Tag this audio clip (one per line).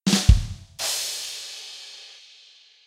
comedic
punchline
drums
sting
slapstick